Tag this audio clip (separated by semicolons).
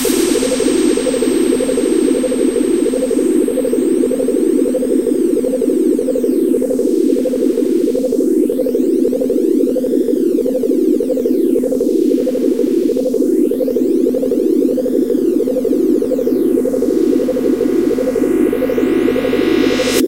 cool; siurrealistic; sound-art